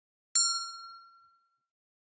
Calling Bell 01
Antique, Bell, Plate, Ringing